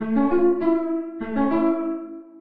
glassy dark piano melody